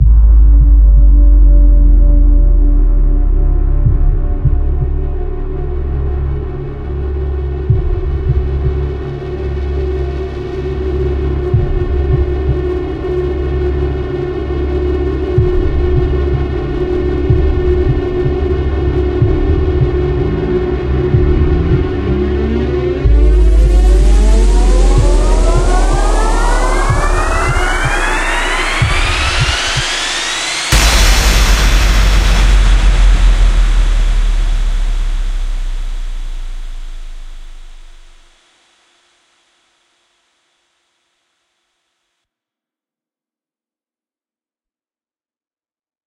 Breaking the Atmophere
A single hit cinematic sound with build
cinematic, electronic, suspense, horror, atmospheric